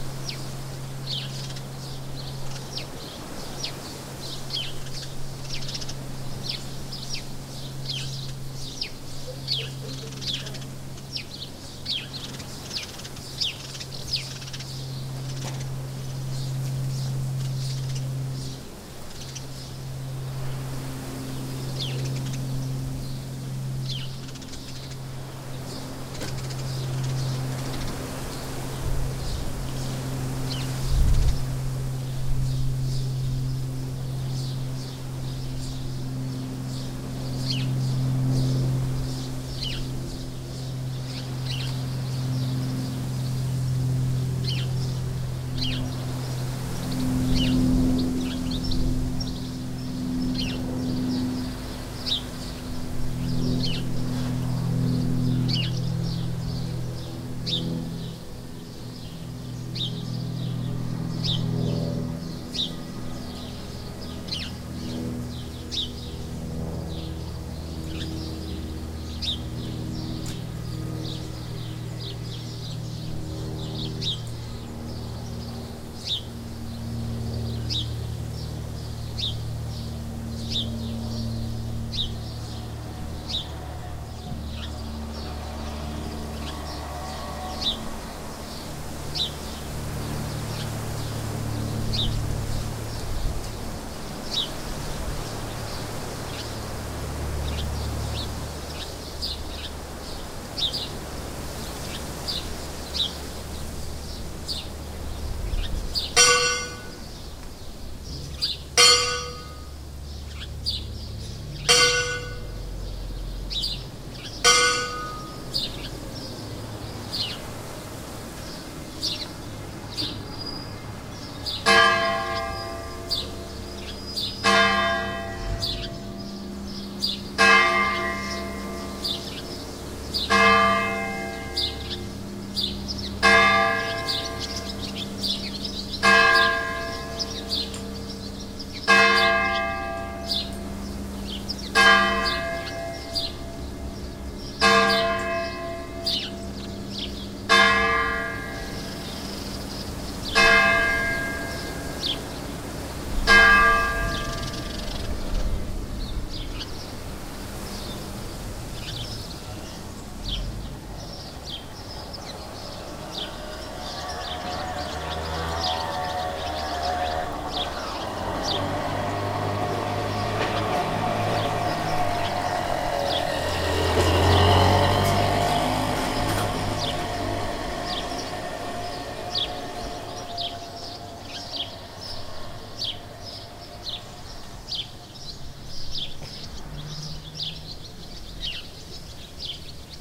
bird ambiance with motorcycle and church bells
field recording with lots of birds @ a village in Spain.
5th recording in a group of seven.